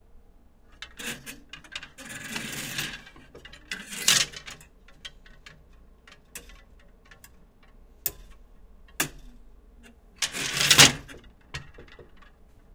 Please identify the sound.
Banging around in a closet